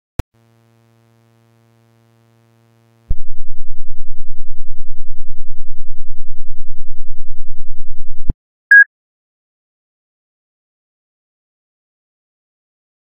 Simulated logitech h600 wireless headset pairing sequence. I got these at a best buy nearest me. It's a decent headset.
beep, bleep, buzz, click, computer, digital, electronic, headphones, headset, ping, wireless